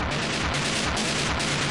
Make It Stop2 140
Some fairly cool and somewhat annoying sounds I came up with. Thanks for checking them out!